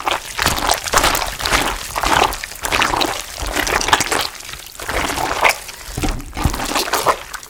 Pumpmkin Guts 8
Pumpkin Guts Squish
guts, pumpkin, squish